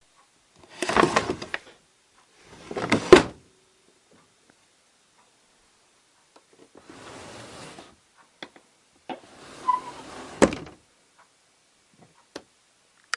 Opening/Closing drawer #2
Quickly opening and then closing a drawer.
close, closing, drawer, open, opening